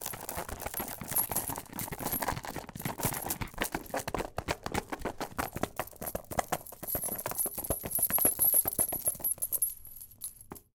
dog chain on leather boots